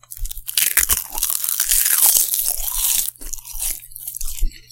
Eating, crunching
Can be used for a lot of different eating sounds. A little wet and slimy but crunchy at the same time. Could just be a person eating or a monster eating a person.
flesh, bones, chips, monster, crunching, eating